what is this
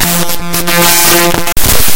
unins000rip
A sample from a databent file in Audacity. Use and abuse at will :)
glitch, unprocessed, databending, digital, raw